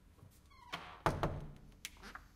A wooden door closing and opening
door, close, wood, open